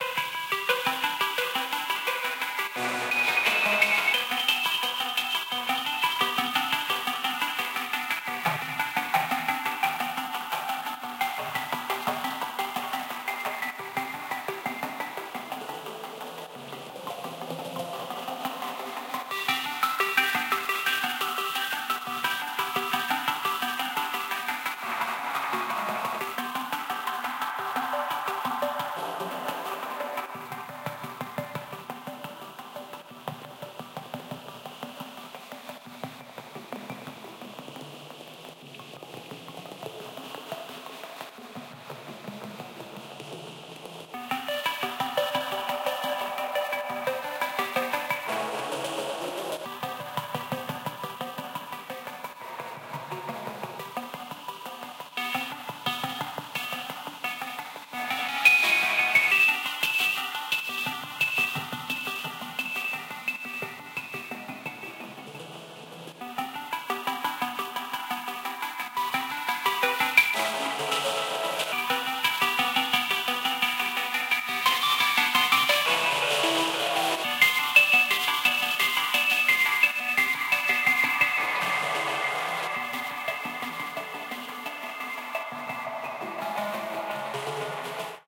ARP Synth and Chords FX
chords, synth